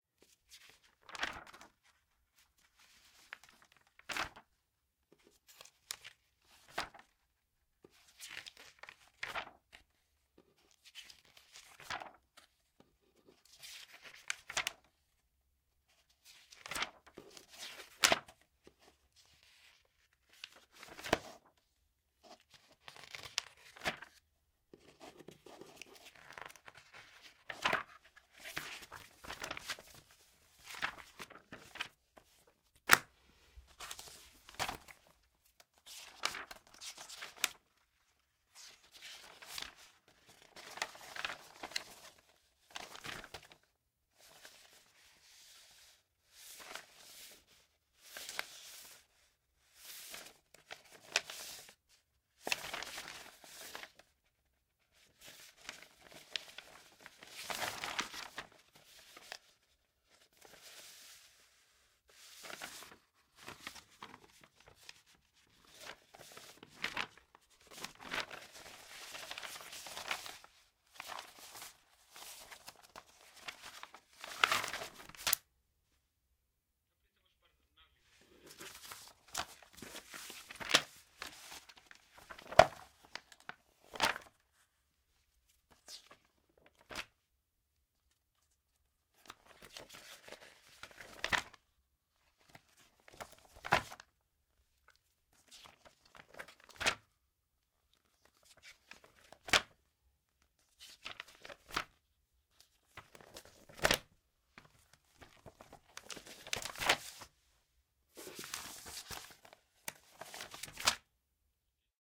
FOLEY paper handling papiri, fascikle
fascikle, handling, paper, papiri